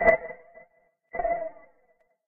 voice-like soundtaken from vectrave an experimental virtual synthesizer by JackDarkthe sound was heavily processed with a multieffects chain[part of a pack called iLLCommunications]
future, soundtrack, space, soundeffect, vintage, lab, analog, commnication, funny, movie, oldschool, signal, effect, vocoder, sci-fi, computing, synth, digital, retro, film, synthesizer, data, talbox, scoring, cartoon, info, soundesign, voice, spaceship, fx